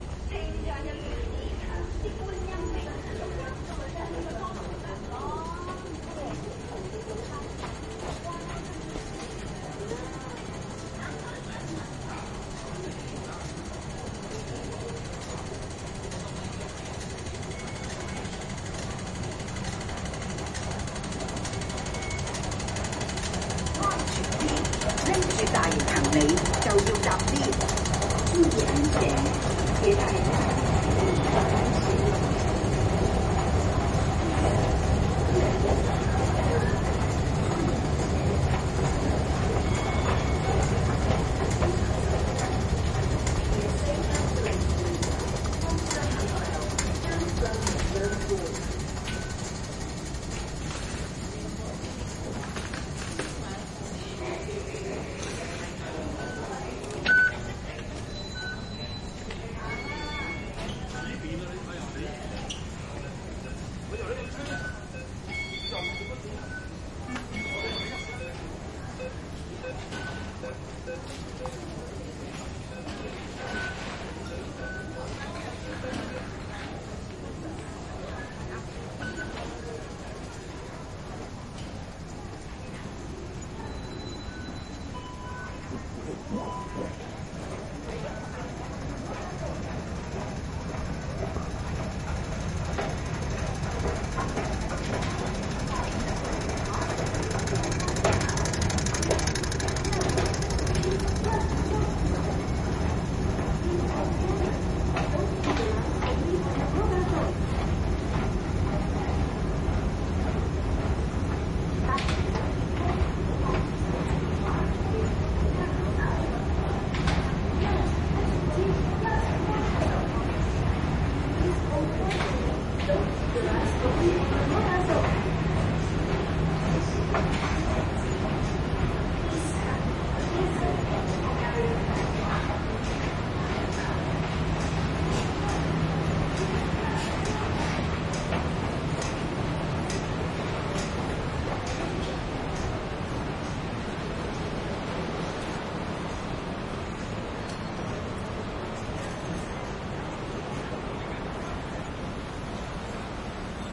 MTR Sounds-2
The clip was taken from a short ride on an escalator in the MTR. There were confirmation beeps of the entrance/exit gate, there was also the clicking sound to help the visually impared to locate the escalator.
audio-aid-for-the-visual-impaired, announcement-at-the-escalator, exit-gate-signals